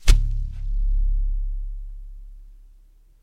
Balloon Bass - Zoom H2